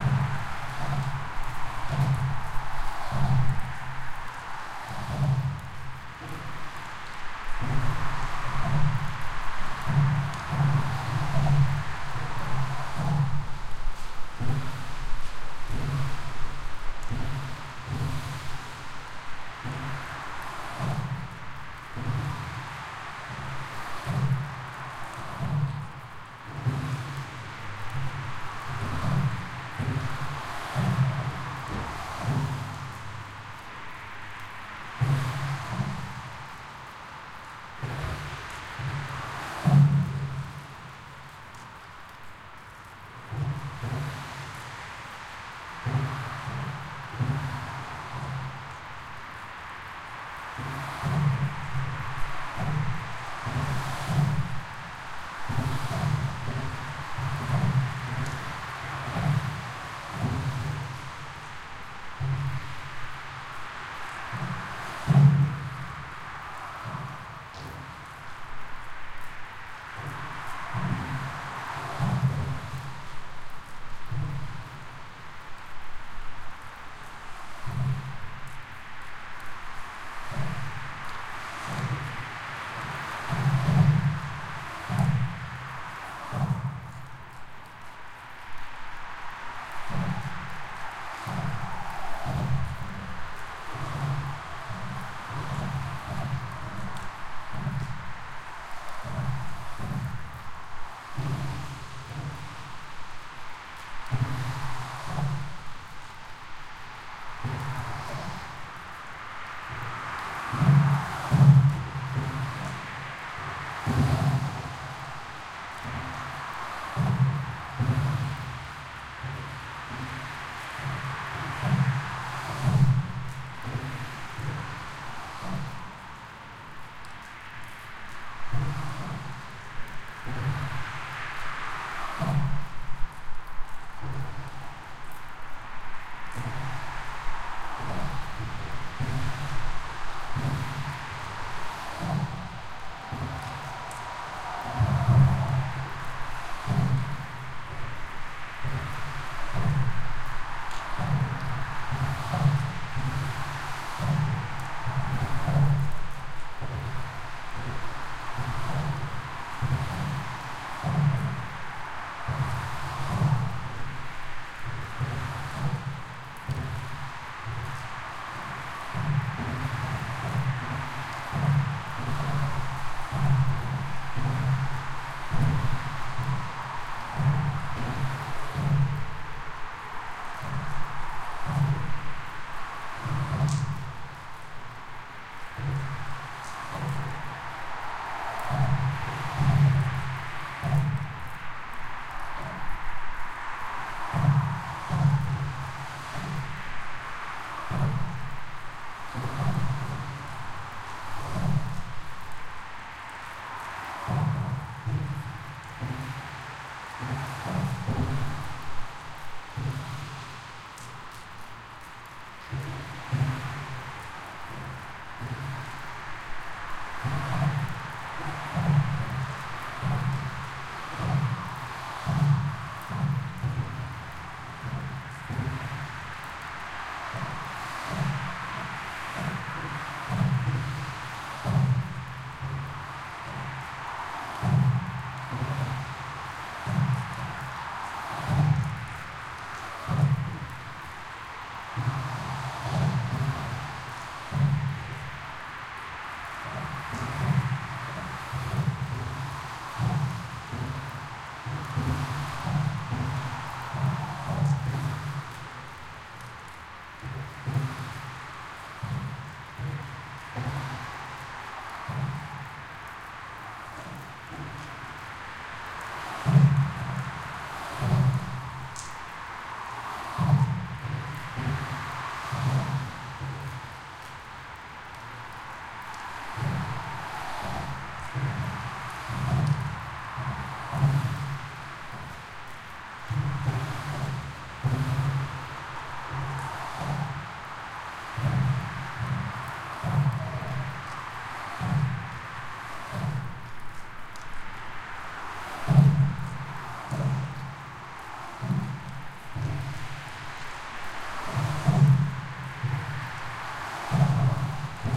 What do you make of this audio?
Below the Highway bridge
Recorded under a Highway bridge
Heavy bumping on the piles and beams.
Rain, Rushing, Autobahn, Germany, Car, Soundscape, piles